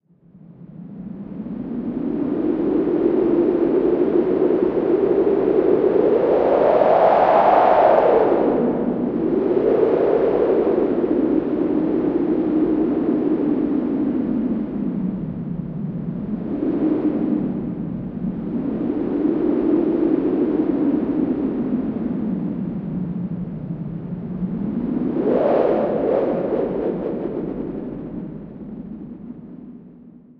Impending Storms
A sound effect made from white noise in REAPER using its JS plugins "White Noise Generator" with automation (panning, filter-scales, resonance) and reverberation.
synthesized, whitenoise, wind